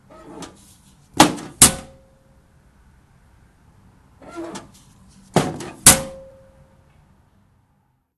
Letter in mailbox
Opening a metal mailbox, dropping a heavy letter inside, and quickly shutting the mailbox-- two times, the second louder than the other.
I attempted to remove the traffic from the background as best I could!
drop, letter, metal